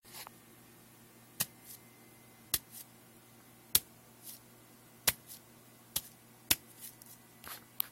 The sound of a person walking normally on the flat floor.
field-recording, person, slow, footsteps, sandals, walk, steps, floor, walking